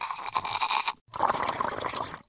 cartoon straw bubbles
cartoon style slurping and blowing bubbles